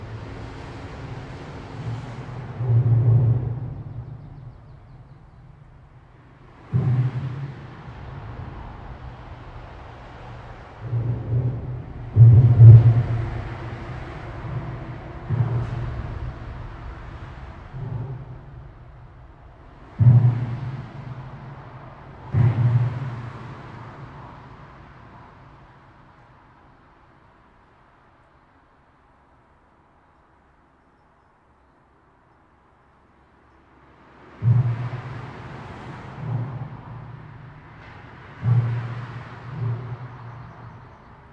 140618 A38Underpass Joint R
4ch field recording of an underpass below a German motorway, the A38 by Leipzig.
The recorder is located directly beneath an expansion joint on the edge of the underpass, the clonks and clanks of cars and trucks driving over the joint can clearly be heard, with the motorway noise reverberating in the underpass in the background.
Recorded with a Zoom H2 with a Rycote windscreen, mounted on a boom pole.
These are the REAR channels, mics set to 120° dispersion.
atmo, atmosphere, Autobahn, backdrop, background, car, cars, clank, clonk, driving, field-recording, freeway, highway, hollow, loud, motorway, noisy, road, surround, traffic, tunnel